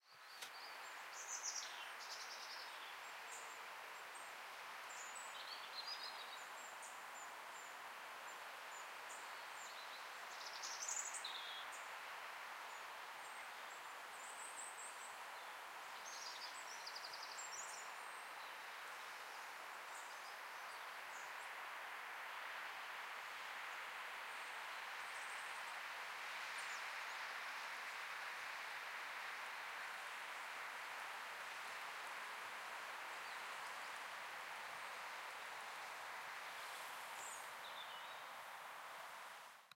birds chirping in the street